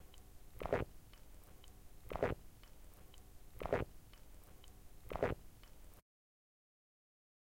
swallowing food swallowing your words swallowing liquids